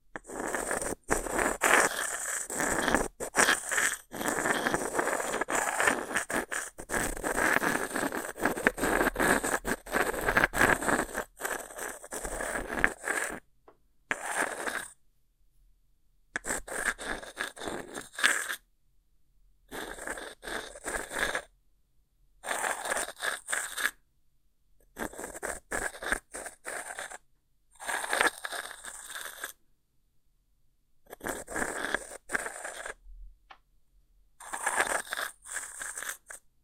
Frottement de deux pierres.
Rubbing of 2 stones.